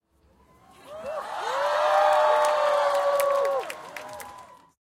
181001 006 crowd cheer
crowd cheer with claps, men's in the middle, girls' laughing at the beginning
crowd, applause, cheering